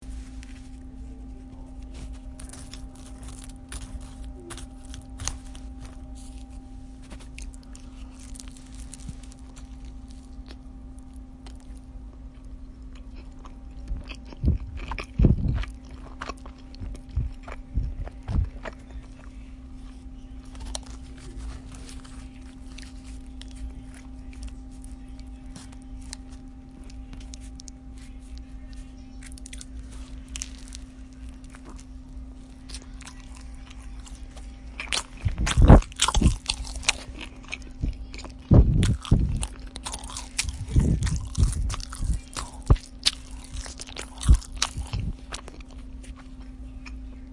Chicken and cheese quesadilla
eating, mouth